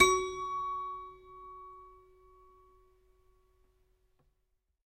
Michelsonne 66 Gb3 f
multisample pack of a collection piano toy from the 50's (MICHELSONNE)
piano, collection, michelsonne, toy